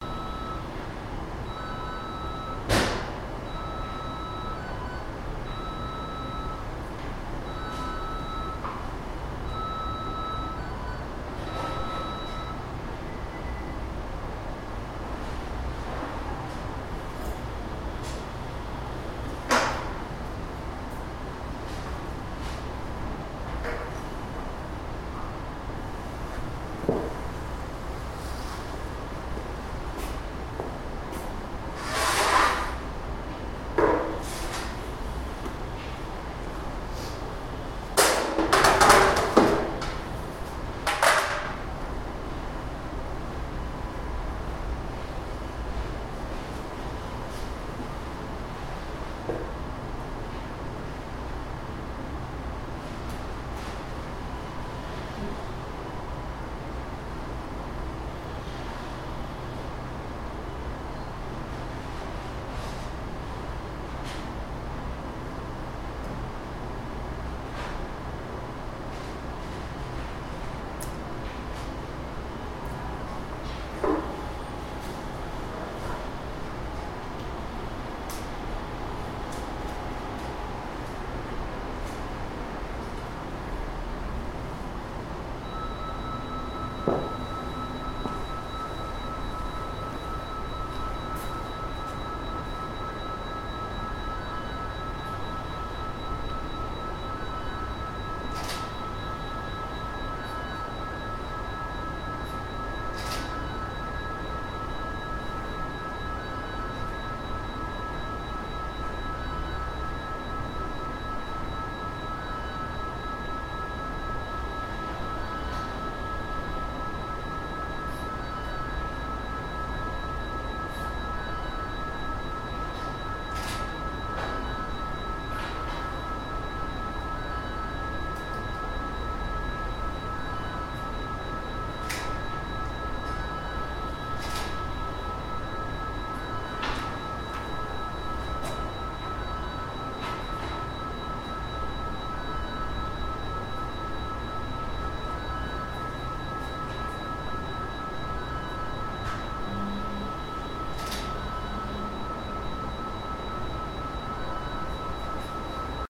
macdonalds-atmosphere-R84
I recorded the noise of a Mac Donald's restaurant, you can hear machines in their kitchens, a few steps, something is thrown in the trash. There are few people in this restaurant, I am almost alone in this part of the restaurant. + airing noises
atmosphere, field-recording, macdonalds, noise-machine, restaurant